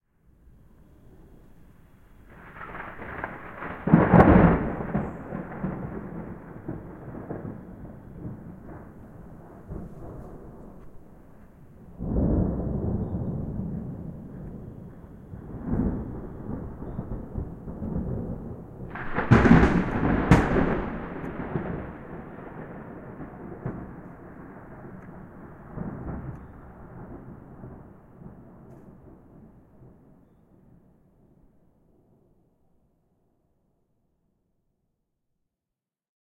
Thunder sound effect 3
Thunder sounds recorded with Tascam DR 07 and remastered with Adobe Audition
wind, thunder, rolling-thunder, thunder-storm, shower, lightning, rain, nature, ambient, thunderstorm, storm, field-recording, suburb, strike, explosion, weather, water